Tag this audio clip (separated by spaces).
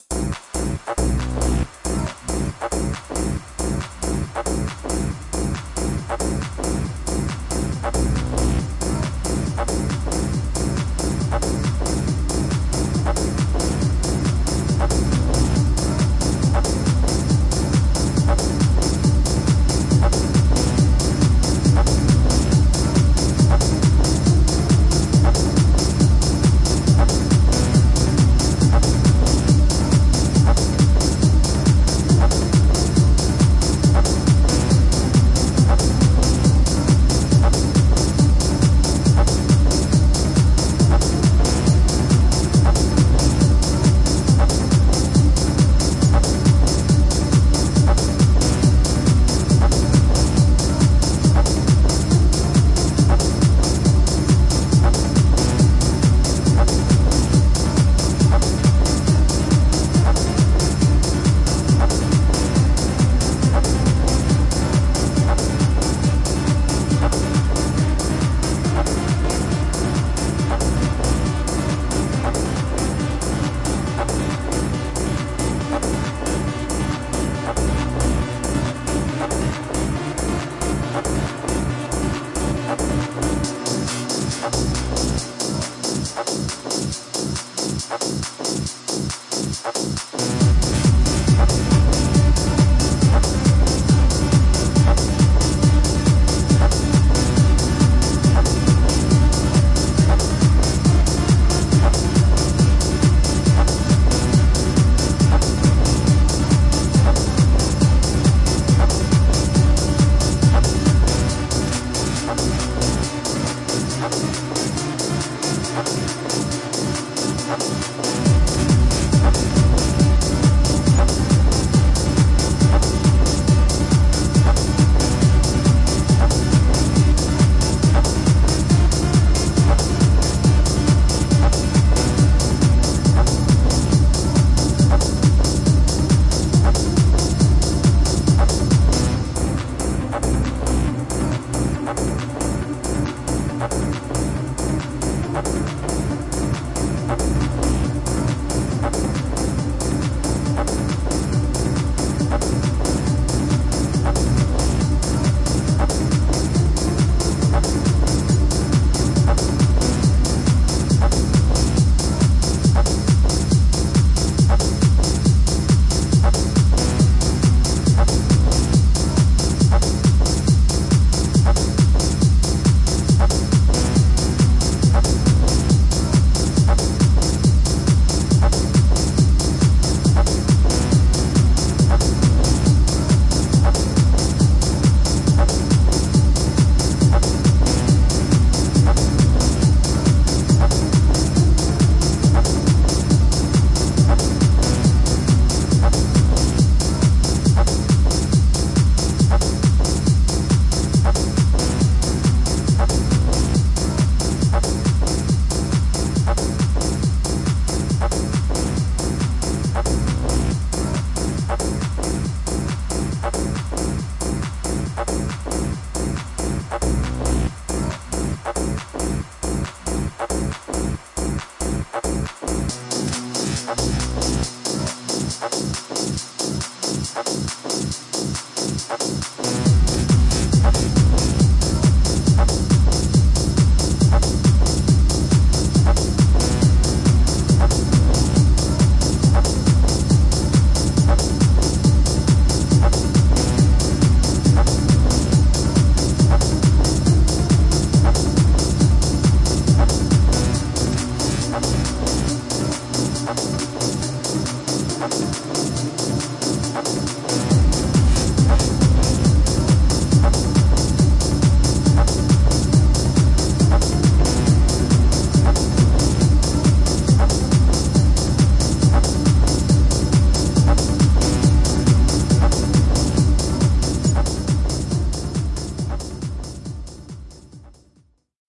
demo-track dance techno wave 4-mins club